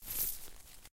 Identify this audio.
Knight Left Footstep Forest/Grass 3 (With Chainmail)
A chainmail wearing knight’s footstep (left foot) through the woods/a forest. Originally recorded these for a University project, but thought they could be of some use to someone.